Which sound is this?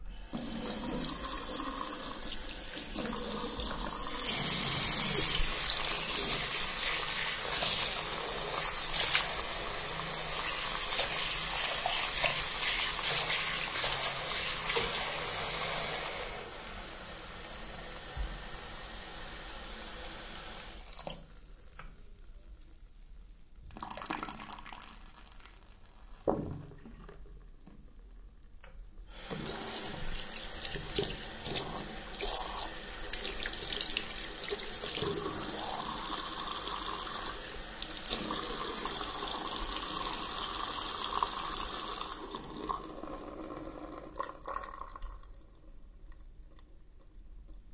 Tap running in bathroom;
recorded in stereo (ORTF)